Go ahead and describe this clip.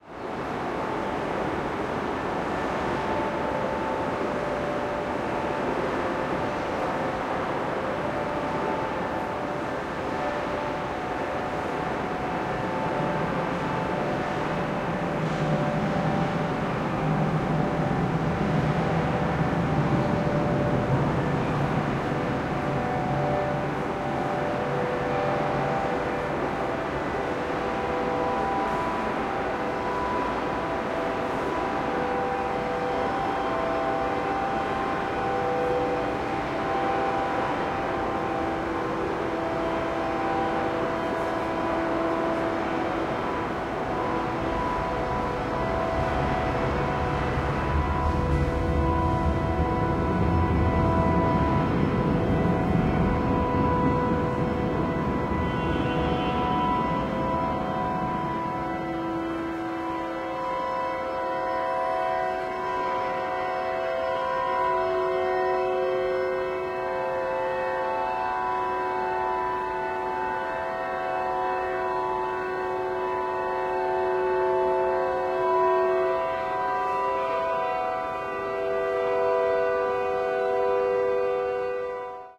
Accordeonist playing inside metro station Móricz Zsigmond, Budapest
Accordeonist Ádám Móser playing inside metro station Móricz Zsigmond, Budapest (HU) while rumbling trams are passing over. Recorded in ms-stereo with the Audiotechnica BP4029 and a Zoom F8 field mixer.
If you need more material from this recording session, send me a message.